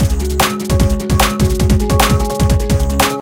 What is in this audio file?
Dangeroius Jobs 19
Glitch/dnb/dance/trip-hop/hip-hop/electronic.
electro, bass, hiphop, samples, trip, experimental, glitch, 150, dance, instrumental, beat, electronic, drum, loops, looppacks